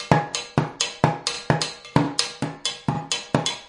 IMPROV PERCS 052 2 BARS 130 BPM
Sources were placed on the studio floor and played with two regular drumsticks. A central AKG C414 in omni config through NPNG preamp was the closest mic but in some cases an Audio Technica contact mic was also used. Two Josephson C617s through Millennia Media preamps captured the room ambience. Sources included water bottles, large vacuum cleaner pipes, a steel speaker stand, food containers and various other objects which were never meant to be used like this. All sources were recorded into Pro Tools through Frontier Design Group converters and large amounts of Beat Detective were employed to make something decent out of my terrible playing. Final processing was carried out in Cool Edit Pro. Recorded by Brady Leduc at Pulsworks Audio Arts.